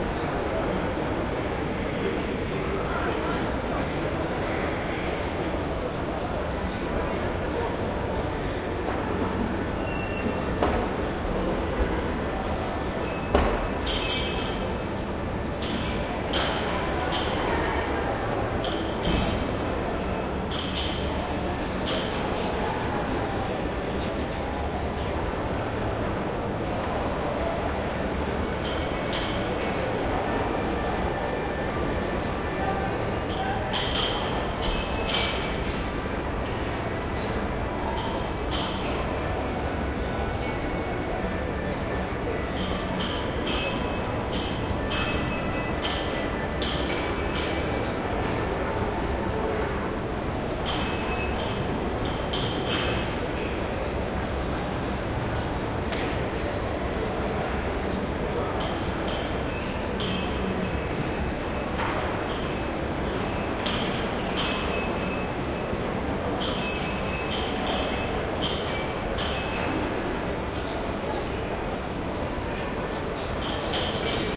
conference hall 2
Atmosphere in the big hall of Novosibirsk Expo Center.
Recorded 30-03-2013.
XY-stereo.
Tascam DR-40, deadcat
hall, noise, Russian-speech, IT, atmosphere, peoples, ambient, ambiance, ambience, atmo, conference, background, background-sound, people, soundscape, atmos